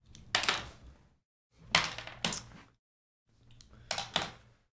eyeglasses remove put down on desk table nice